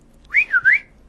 A person whistling like a bird chirp, three quick notes: high, low, slide upward.